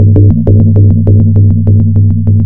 Applied effect : Risset Drum…
Applied effect: VST : Crystal….
Duplicated
Deleted 2.00 seconds at t=0.00
Removed track ' Audio Track'
Duplicated
Duplicated
Time shifted tracks/clips right 0.16 seconds
Time shifted tracks/clips right 0.31 seconds
Time shifted tracks/clips right 0.47 seconds
Mixed and rendered 4 tracks into one new mono track
Applied effect : Normalize.. remove dc offset = true, normalize amplitude = true, maximum amplitude = -0;0dN
Applied effect: Foldover distortion..
Applied effect: Normalize… remove dc offset = true, normalize amplitude = true, maximum amplitude = -0.0 dB
Drums, Echo, Tambour